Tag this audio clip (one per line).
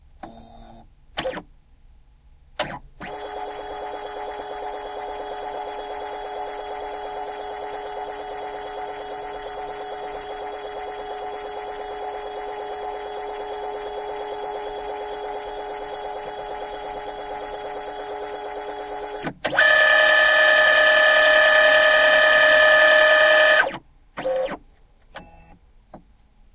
computer
copy
machine
office
paper
pc
print
printer
printing
scan
scaner